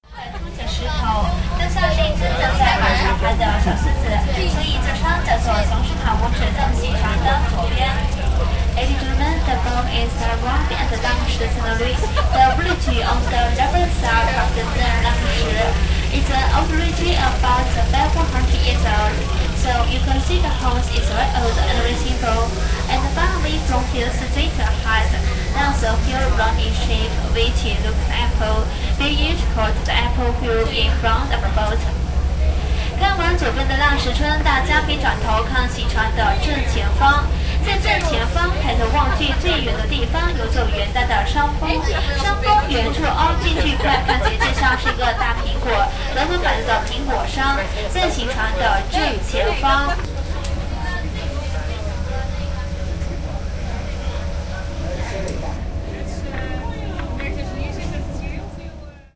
Boattrip on Li-river China
Recorded at a 5 hour during a trip on a boat on the Li-river.
Boat, China, Li-river